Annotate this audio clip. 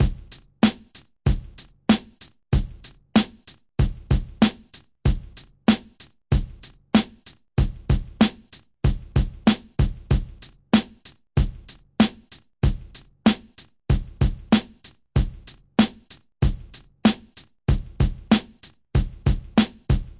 hip hop beat 8
Hip hop beat made using:
Reason 9.5
M-Audio Axiom 49 drum pads
beat, drums, hip, loop, rap